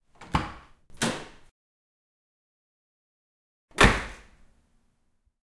Open and Slam Opel Corsa Door
This is an Opel Corsa door being opened and slammed. Recorded inside a garage (6 x 6 meters). There is quite a bit of natural reverb captured in this sample.